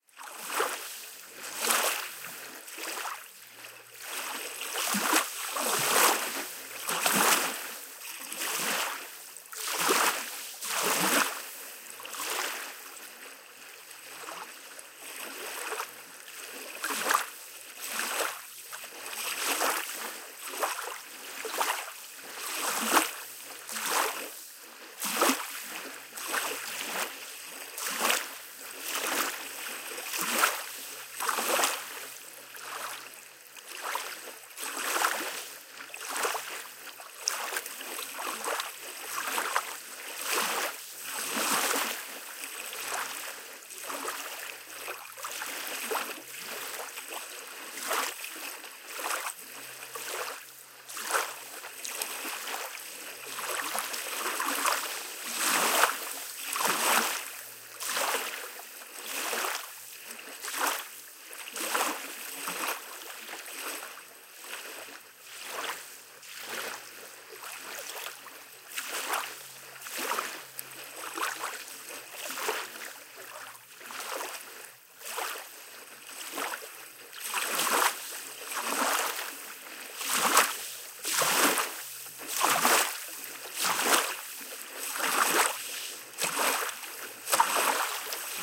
seashore egypt - finepebbles
beach, egypt, seashore